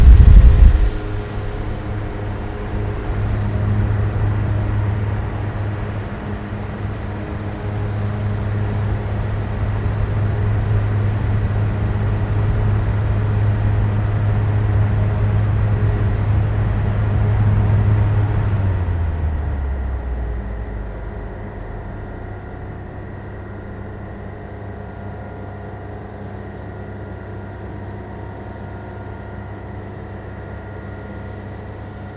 Fan Ventilation Mono 7
Ventillation ambience from Lillehammer Norway
ambience, ambiance, soundscape, atmosphere, atmo, white-noise, atmos, ambient, background, general-noise, background-sound